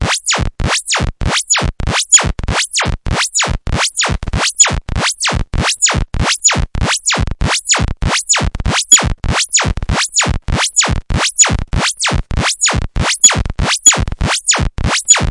Cheap Sci-Fi-like cartoon sound effect. Recreated on a Roland System100 vintage modular synth.